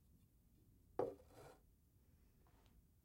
setting glass down
glass, setting, down